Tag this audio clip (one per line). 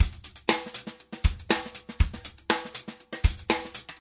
acoustic
drum
funk
loops